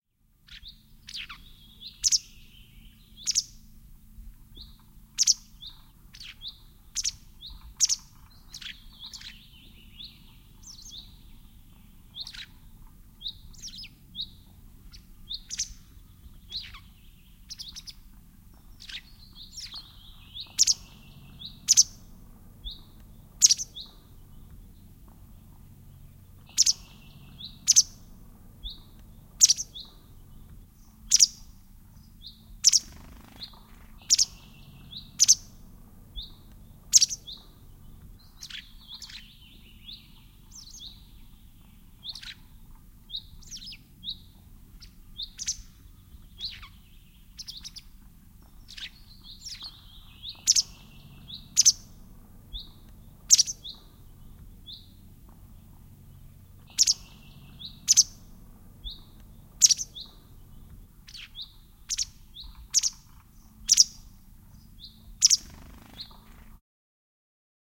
Västäräkki, viserrys / A white wagtail chirping, twittering on a rock, wings, some distant sounds in rhe bg (Motacilla alba)
Västäräkki ääntelee, visertää kivellä. Välillä siipien pyrähdys. Taustalla vähän muita ääniä. (Motacilla alba).
Paikka/Place: Suomi / Finland / Puruvesi
Aika/Date: 17.05.2001